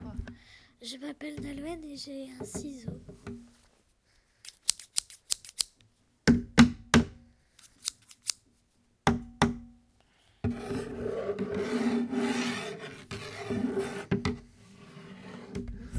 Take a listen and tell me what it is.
mysounds-Nolwenn-ciseaux
mysounds, saint-guinoux